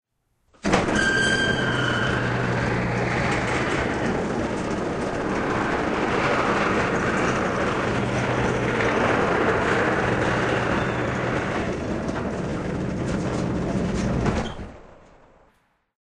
spooky warehouse door open
This is a garage door opening but pitched down to make it sound like a bigger, spookier door.
Hear all of my packs here.